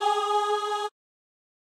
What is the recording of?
Just a simple Choir sample in G#.
Made with DSK Choir